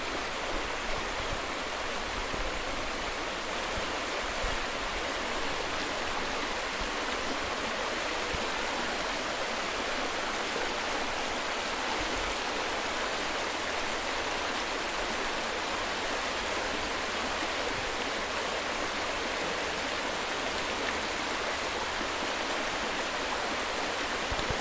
Son d'une rivière en France (Sarthe). Son enregistré avec un ZOOM H4NSP et une bonnette Rycote Mini Wind Screen.
Sound of a river in France (Sarthe). Sound recorded with a ZOOM H4NSP and a Rycote Mini Wind Screen.
river, rivi, re, france, sarthe